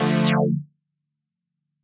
Synth Chord
chord, sound, synthesizer